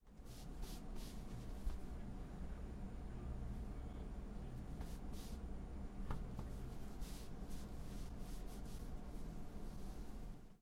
26Sobando Cobija
Kneading a wool blanket.
blanket, knead, wool